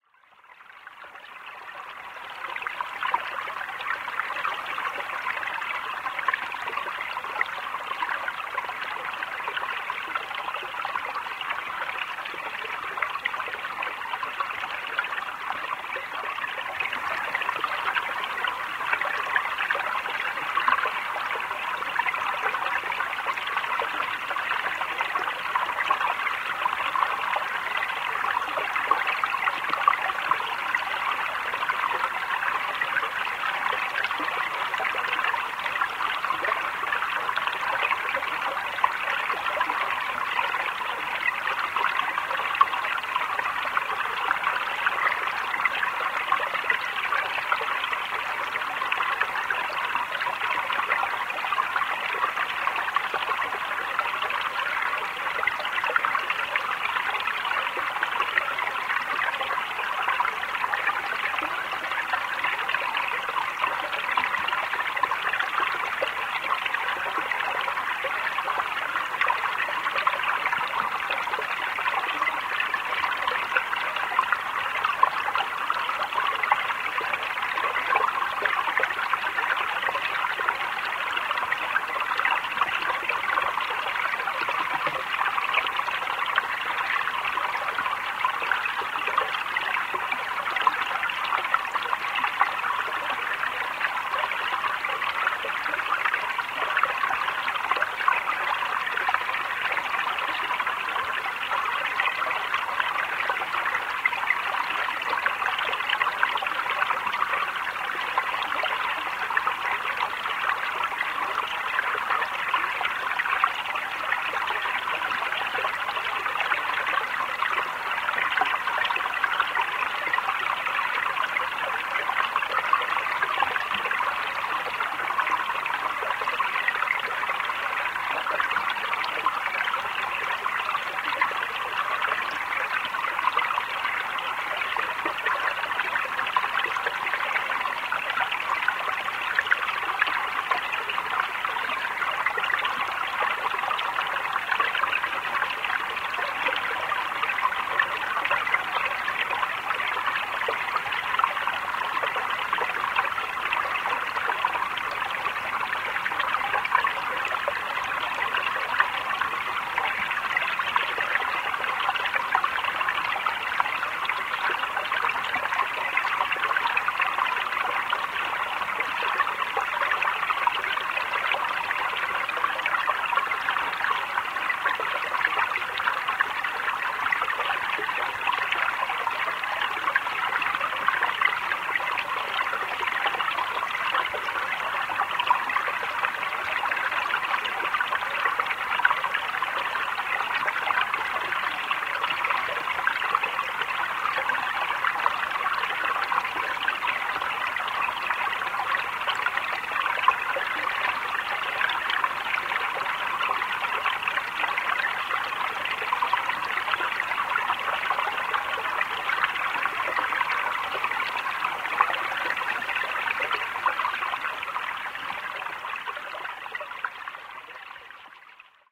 Stream Underwater
A hydrophone field-recording of a stream at a relatively flat location.DIY Panasonic WM-61A hydrophones > FEL battery pre-amp > Zoom H2 line-in.
bubbles, bubbling, field-recording, hydrophones, stream, submerged, underwater, water